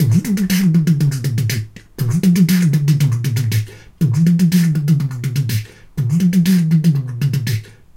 Loop2 005 OverTheTopTomFill
I recorded myself beatboxing with my Zoom H1 in my bathroom (for extra bass)
This is a rather over-the-top tom fill at 120bpm.
120bpm, Dare-19, beatbox, fill, loop, percussion, rhythm, rhythmic, tom